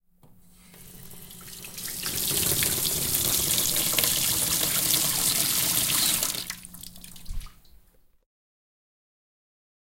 Sink Tap
tap, sink, running, Water